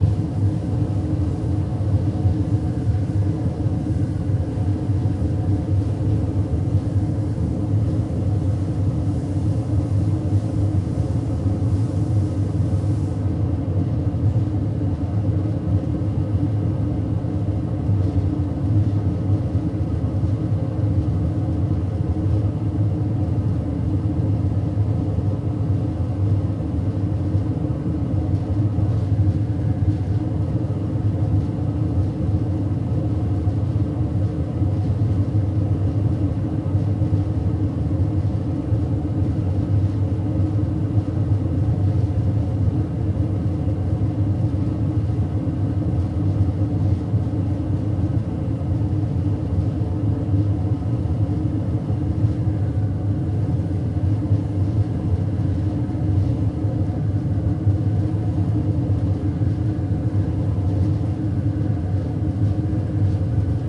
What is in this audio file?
Gas fired bronze furnace with electric bellows blowing air into the mix. Very noisy at about 95db locally.
Smelting, Furnace, Gas, Industry, Non-ferris, Bronze